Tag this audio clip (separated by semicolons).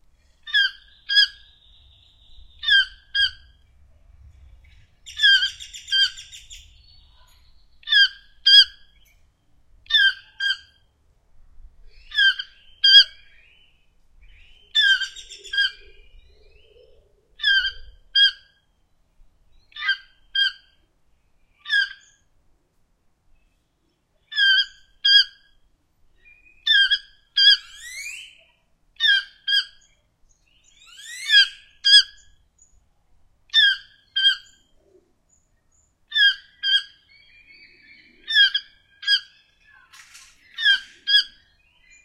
bird; chesnut; field-recording; mandibled; soundbytez; toucancleaned; tropical